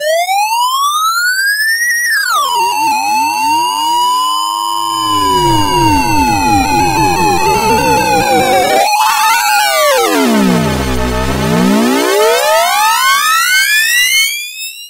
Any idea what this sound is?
Boson Spinner - 05
retro, sci-fi, warp